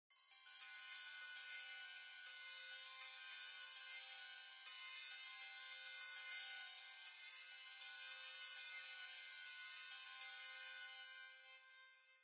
Shimmering Object 2
Another version of a shimmering object. Generated in Gladiator VST
artifact, chimes, shimmer, object, fairy, magic